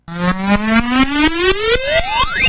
Atari FX 11
Soundeffects recorded from the Atari ST
Chiptune, Soundeffects, YM2149